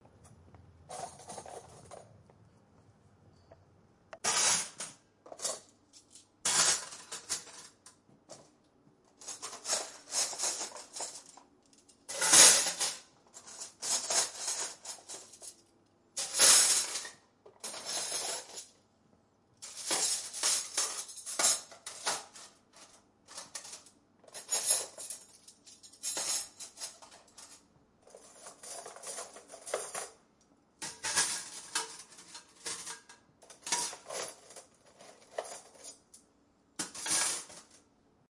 mySound GWECH DPhotographyClass forks
cafeteria, fork, forks, knife, metal, spoon